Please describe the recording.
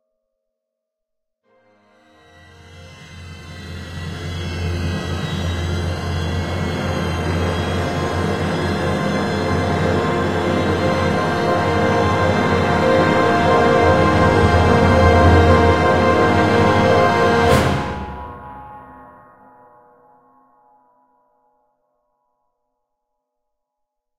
A giant robot taking a single step described using various instruments in a crescendo fashion.